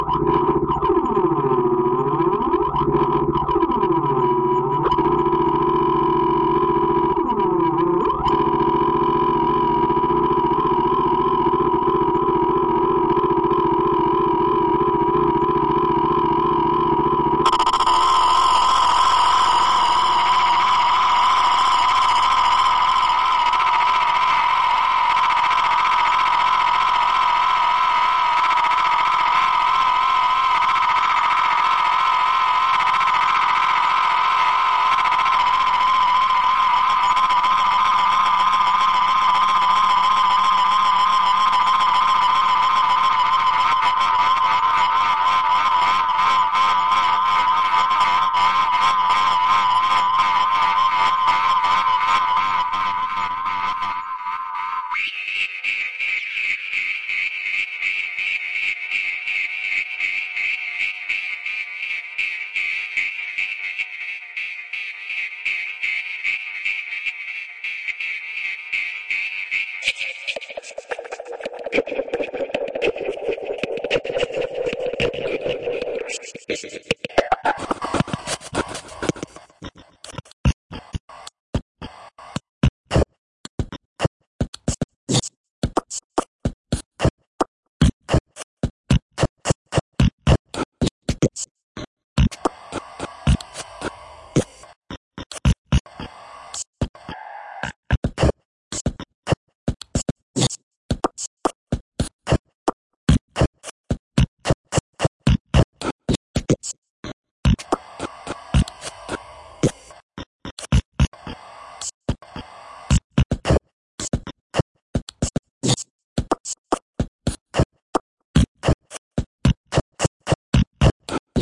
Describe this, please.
bup bop 2021-05-16--11.43.37
Bup bop nonsensical noise
Snickerdoodle, voice, bopping, spoken, nonsense, Music